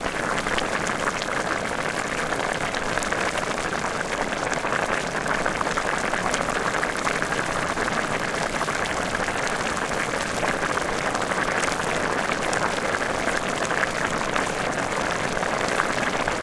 pilaf is boiling in cauldron 2
I cooking pilaf in cauldron outdoors
fire, water, boil, cooking, cauldron, saucepan, pilaf, Kitchen, bubbling, brazier, food, pan, boiling, boiling-water, hot, outdoors, kettle